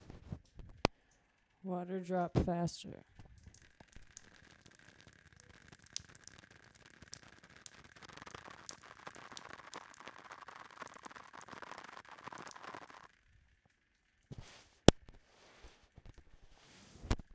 Fast Waterdrop
water drop a little faster
fast, shower, water